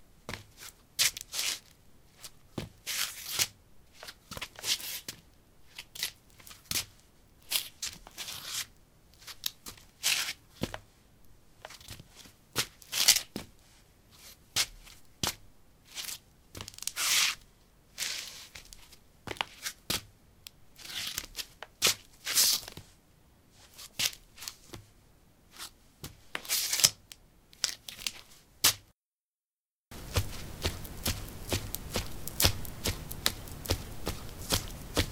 paving 04b sandals shuffle tap
Shuffling on pavement tiles: sandals. Recorded with a ZOOM H2 in a basement of a house: a wooden container filled with earth onto which three larger paving slabs were placed. Normalized with Audacity.
step, footstep, steps, footsteps